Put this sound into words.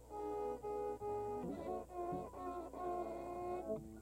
bowed high strings
Recorded on a Peavy practice amp plugged into my PC. Used a violin bow across the strings on my Squire Strat. Bowed some of the higher strings on the lower part of the fretboard.